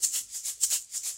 Egg Shaker 10
Sound of a homemade egg shaker
Percussion, Shaker